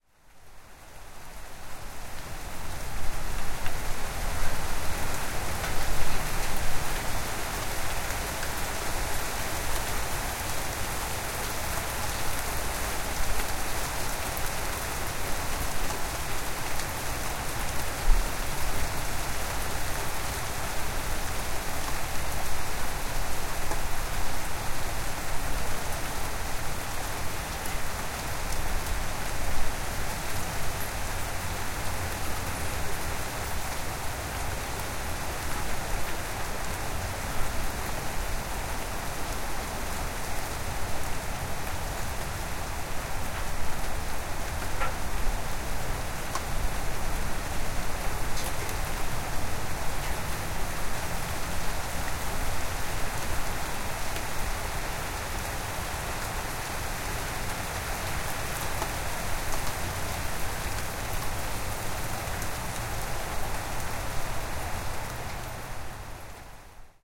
spring-squall
Recorded this brief squall (wind, rain and hail) on my back porch in Salem, Oregon. Recorded with Edirol R-09 HR using it's built-in stereo mic.